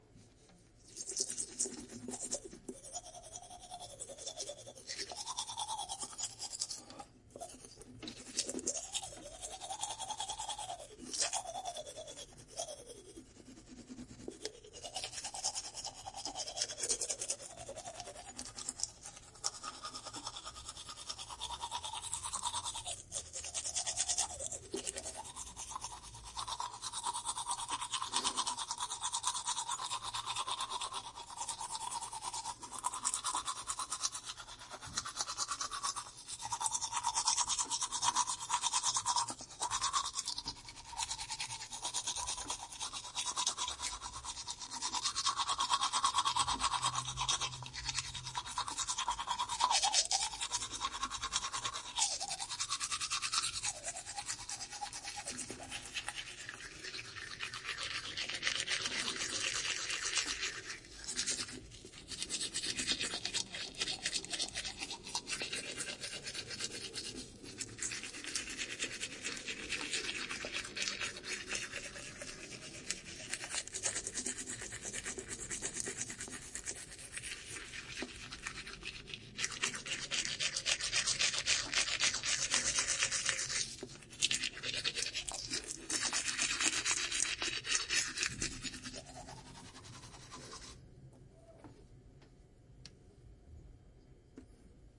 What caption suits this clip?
brushing teeth -binaural
brushing teeth recorded with a binaural setup.